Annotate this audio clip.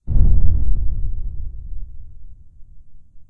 Far Off Boom Without Amplify
Inspired by HerbertBoland's CinematicBoomNorm found here:
I decided to have a go at making something similar. This is the version without any amplification added. I just felt the amplified version gave it more "Umph" but decided to ultimately leave it up to whoever decides to use 'em.
big, explosion, off, away, large, boom, rumble, far